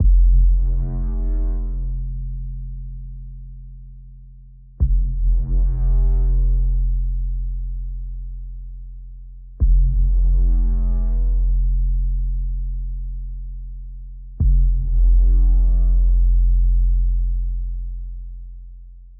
Design in Ableton with Massive-X this sounds like impending doom!